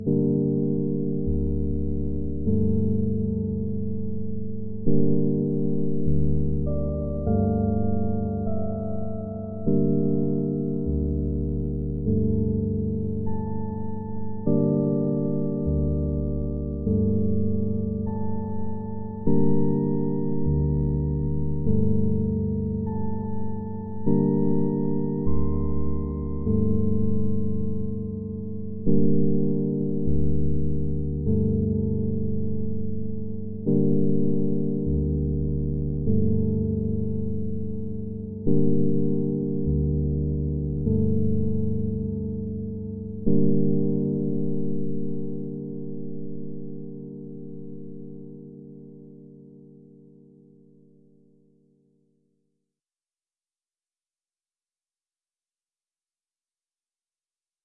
Ambience for a musical soundscape for a production of Antigone
dark, deep, musical, soundscape, ambient, electric-piano, bass